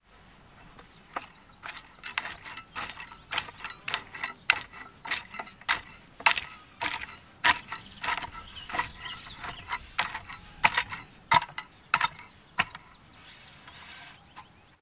A dual mono field-recording of hoeing with a light hoe on a sandy loam (brown earth) containing small stones. Rode NTG-2 > FEL battery pre amp > Zoom H2 line-in.